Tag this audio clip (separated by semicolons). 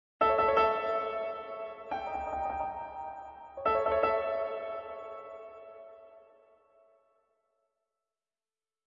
anxious; eager; expectation